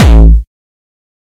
Distorted kick created with F.L. Studio. Blood Overdrive, Parametric EQ, Stereo enhancer, and EQUO effects were used.
bass, beat, distorted, distortion, drum, drumloop, hard, hardcore, kick, kickdrum, melody, progression, synth, techno, trance